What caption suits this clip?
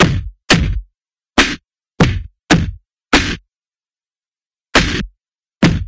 crunchy distorted electronic drums
chopped up sounds i made in ableton from a friend's drumming session.